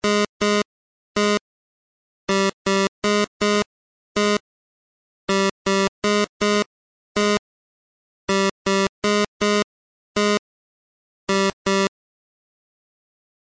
chiptune 8bit light bass
made with LMMS. plugin used: FreeBoy.